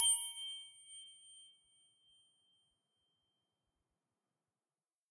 Wrench hit A#4
Recorded with DPA 4021.
A chrome wrench/spanner tuned to a A#4.